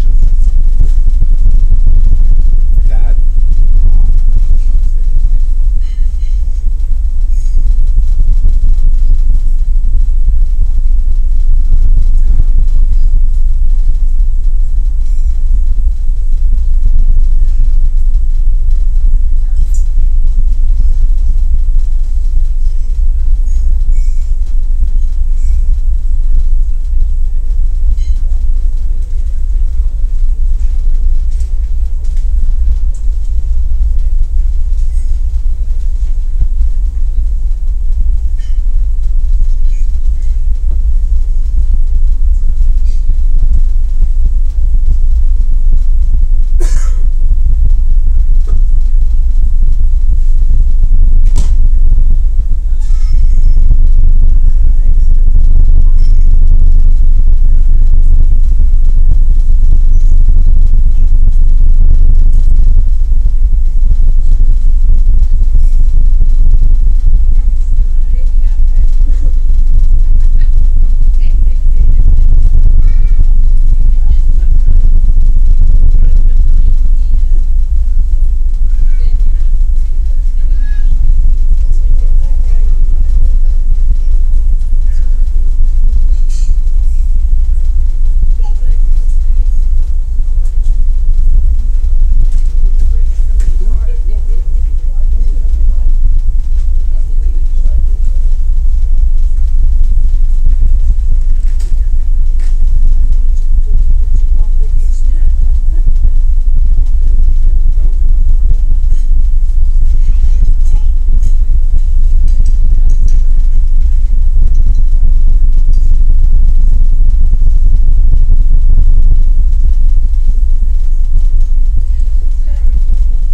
Off an ipod touch field recording of muffled ferry engine, people talking in the passenger lounge